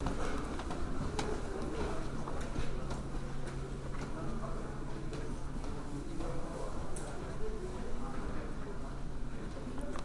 Walking Carpet
carpet, walk